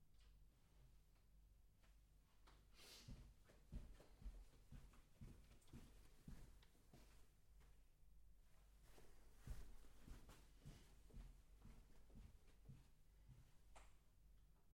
Foodsteps-Sneakers-on-Carpet mono
Recording of my Footsteps on a Carpet. Neumann KMi84 cardio, Fostex FR2.